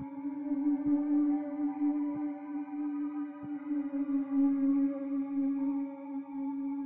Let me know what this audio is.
BarlEY Strings 4
New Orchestra and pad time, theme "Old Time Radio Shows"
strings, silence, ambient, orchestra, pad, scary, background, radio, oldskool, soudscape